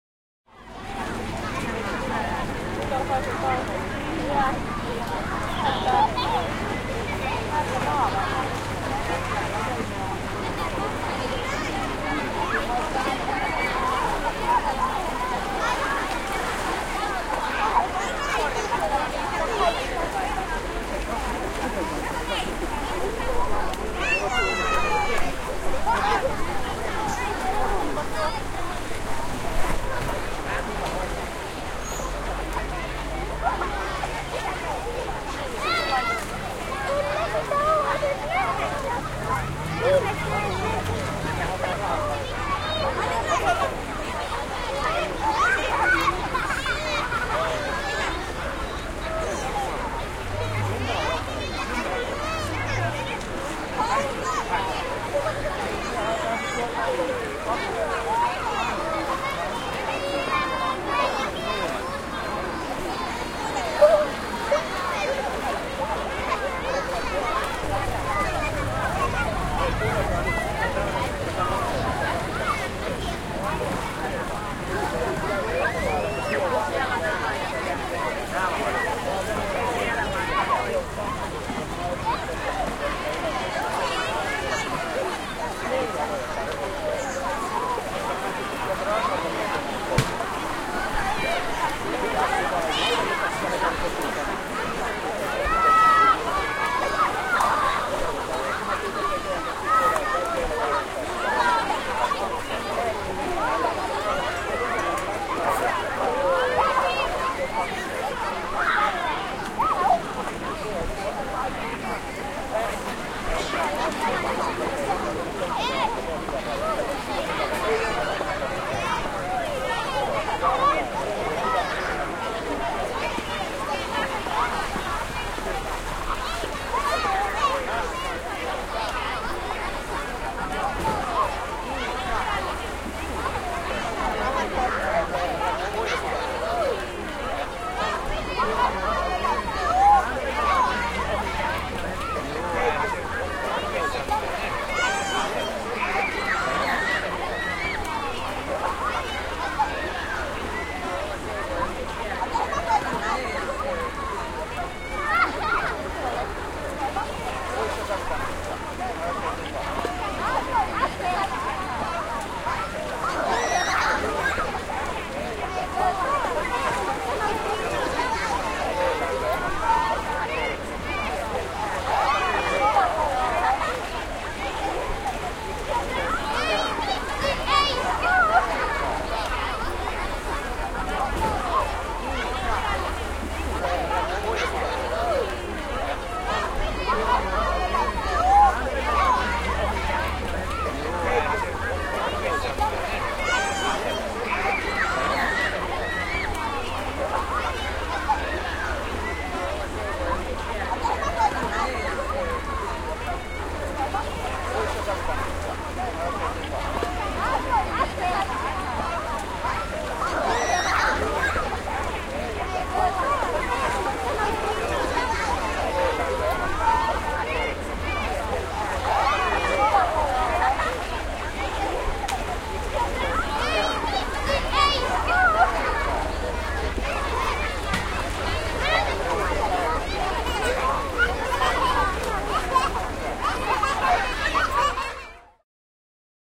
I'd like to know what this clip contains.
Uimaranta, lapsia uimassa / A beach, children swimming and shouting
Lasten vilkasta elämöintiä ja veden loisketta uimarannalla. Välillä vähän aikuisten puhetta. Kesä.
A beach, children swimming and shouting in the water and on the beach, splashes, some adults.
Paikka/Place: Suomi / Finland / Vihti, Myllylampi
Aika/Date: 10.07.2003